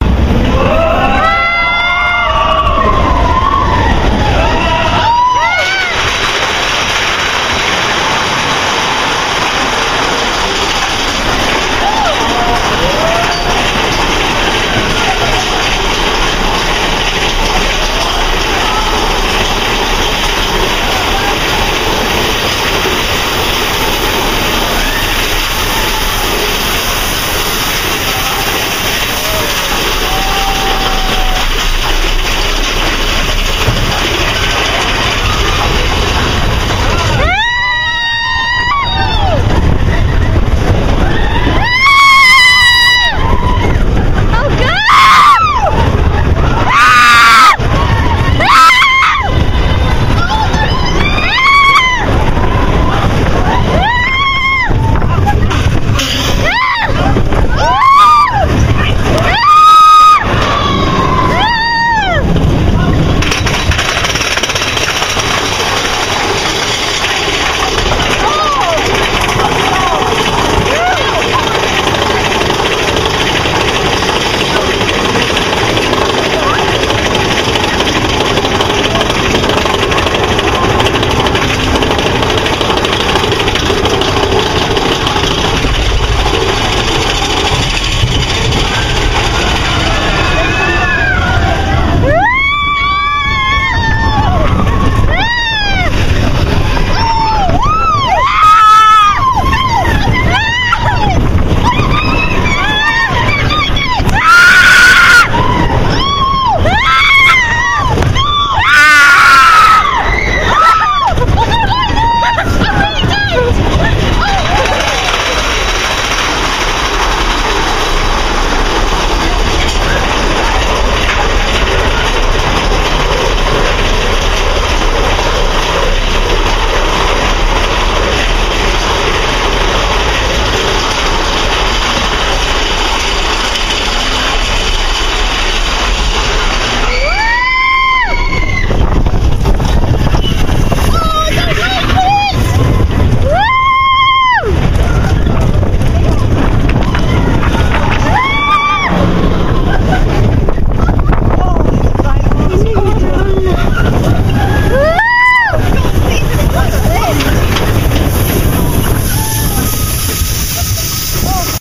Roller Coaster Screams on Big Thunder Mountain in Disneyland